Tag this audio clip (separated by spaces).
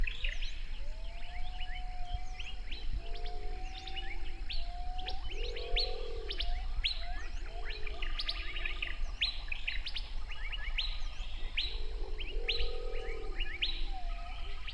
Asia Cambodia East Gibbon Jungle Nature South